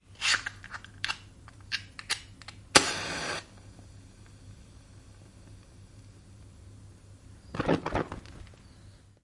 20170530 wooden.match.02
Extracting a wooden match from a box, igniting, then extinguishing (shaking).
Sennheiser MKH 60 + MKH 30 into Shure FP24 preamp, Tascam DR-60D MkII recorder. Decoded to mid-side stereo with free Voxengo VST plugin
match, ignite, fire, matchstick, flame, light, lighter, spark, ignition, cigarette, matches, matchbox, burning, gas, candle